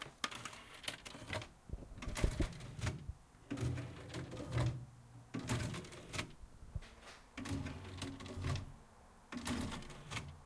Object moving with clatter